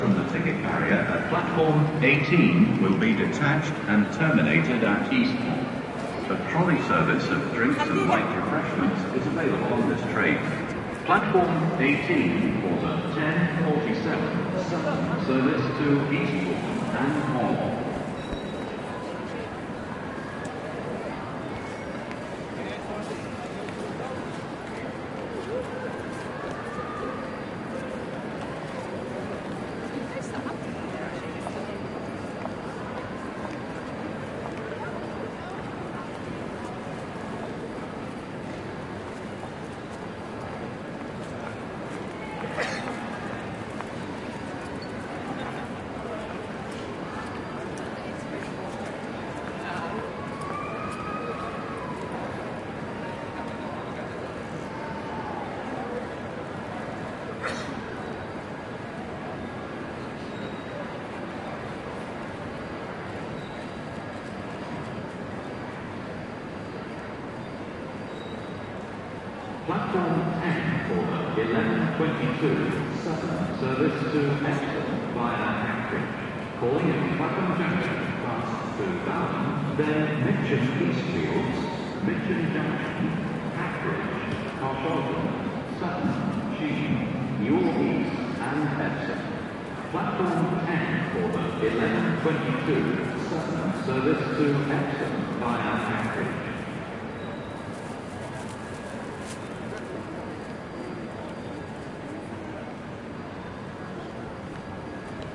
130217 - AMB INT - Victoria Station
Recording made on 17th feb 2013, with Zoom H4n X/y 120º integrated mics.
Hi-pass filtered @ 80Hz. No more processing
Ambience from Victoria Station, London.
with announcements